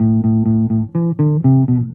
recording by me for sound example to my student.
certainly not the best sample, but for training, it is quiet good. If this one is not exactly what you want listen an other.
bass, electric, sample